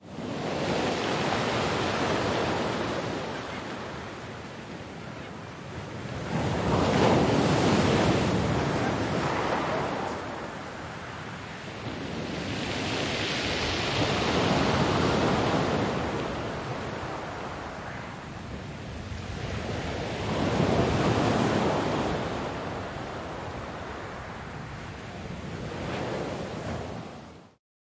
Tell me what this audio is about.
Felixstowe beach waves close stones seagulls stereo
Field recording of waves breaking on Felixstowe Beach in Suffolk, England. Recorded using a stereo microphone and Zoom H4 recorder close to the water to try and capture the spray from the waves. Wind shield was used but a little bit of wind exists on the recording with a HPF used to minimise rumble. This recording also features some distant seagulls.
wind, Stereo, stones, Suffolk, Ocean, Sea, Felixstowe, nature, Field-Recording, Beach, seagulls, Waves, England, spray, Water, Summer, north-sea